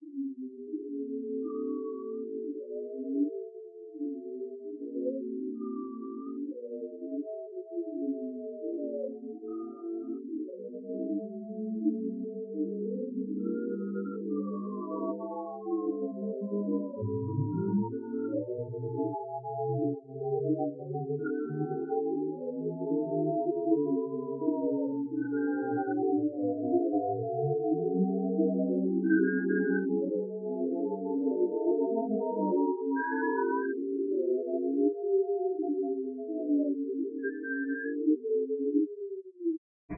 Flute-like sound in irregular structure. The sound is generated by the syntethizer of the Coagula program. The basis is a multi-layered graphic structure created in a graphics program.
ambient, background-sound, soundscape, white-noise